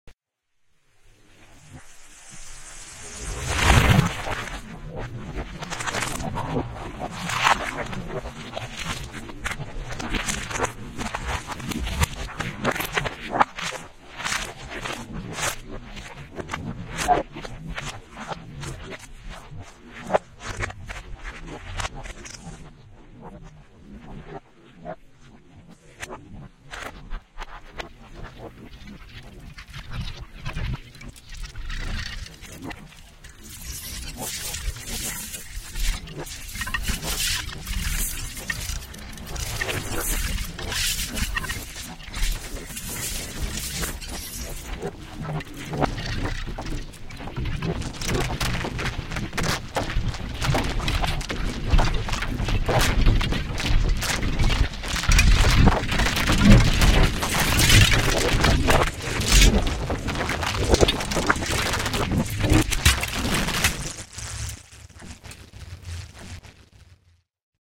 this is another experimental sound attempt to simulate an imaginary sound world heard by microscopic objects (particles) as they get carried away by the intensity of the wind. the sound source used here are edited sections from several field recording sessions, which took place in an underground construction area in the netherlands. the original recording was chopped into short 1sec-10sec fragments, re-arranged and processed with various filters and custom effects. i tried to tune the envelopes of each of the sound fragments and put them together in such way that will hopefully give them the characteristics of the wind and air stream. i also used amplitude and phase inversion techniques for that purpose.
recorded using C1000 condenser mic and Sony MZ-N505 minidisc. additional editing, EQ tunings and stereo panning were done in Peak. effects processing in PD.
air, field-recording, processed, sound-design, wind